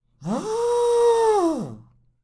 a sound of a bull
bull, soun, animal